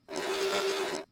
Old Robot Digital Thinking
retro, machine, Old, thinking, digital, processing